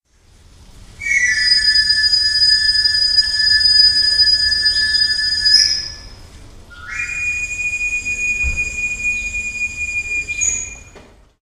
a whistle /silbato de afilador
the sound you hear when knife sharpeners are calling out to the people in Spain: they blow a typical whistle, announcing themselves.